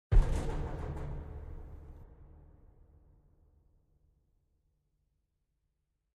Rumbling Elevator
elevator, rattling, rumbling, moving, motion, rumble, movement, shock, shaked, metal, stutter, waggle, rattle